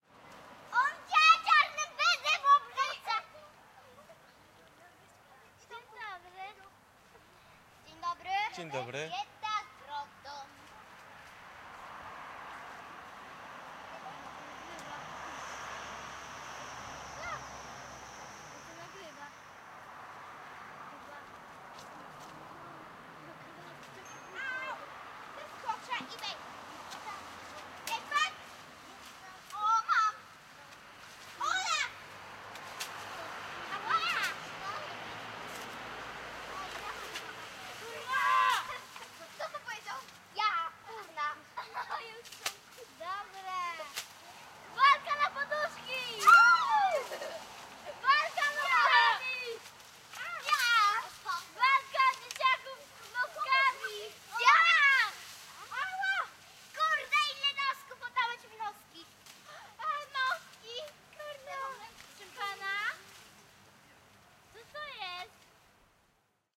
08092014 Torzym playing children
Fieldrecording made during field pilot reseach (Moving modernization
project conducted in the Department of Ethnology and Cultural
Anthropology at Adam Mickiewicz University in Poznan by Agata Stanisz and Waldemar Kuligowski). Playing children in the center of Torzym - on Warszawska St. (Lubusz). Recordist: Robert Rydzewski, editor: Agata Stanisz. Recoder: zoom h4n + shotgun
children, fieldrecording, lubusz, playing, poland, street, torzym